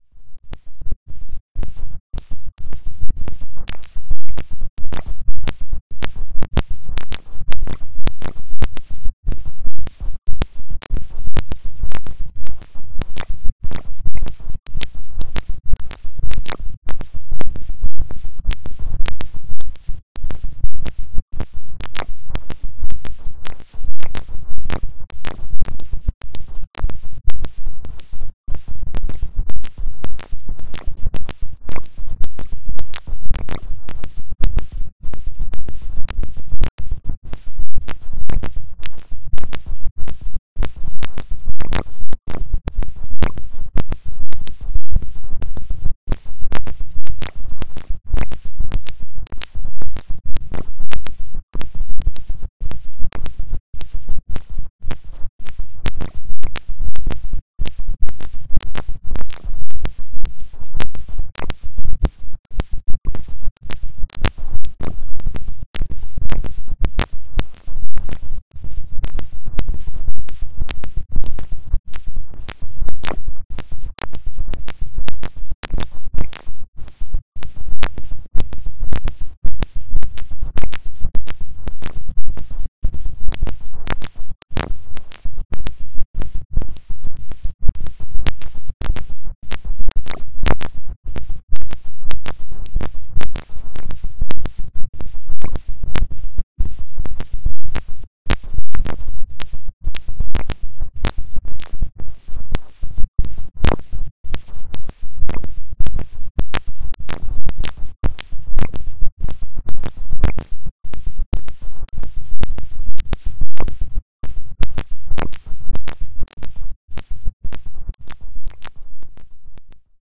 Noise Garden 08
1.This sample is part of the "Noise Garden" sample pack. 2 minutes of pure ambient droning noisescape. Noise interrupted with clicks.
electronic, soundscape